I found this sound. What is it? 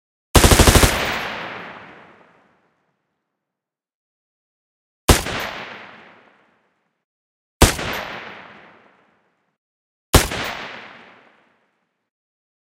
Automatic Assault Rifle
Made in ableton live, layered and processed together and assembled into a loop resembling automatic fire. Couple single shots with minor pitch differences in there for bonus.
assault, battle, gun, military, rifle, shot, war, weapon